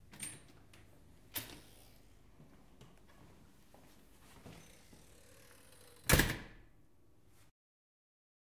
DOOR OPEN CLOSE METAL-003
Door Open Close
Close, Door